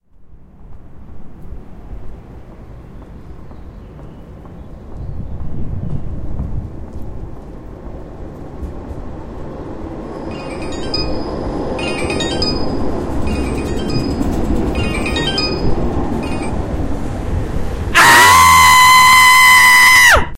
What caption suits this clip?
charline&thomas
This is a result of a workshop we did in which we asked students to provide a self-made soundtrack to a picture of an "objet trouvé".